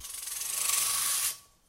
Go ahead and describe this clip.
Sliding down metal blinds
brush, hits, objects, random, scrapes, taps, thumps, variable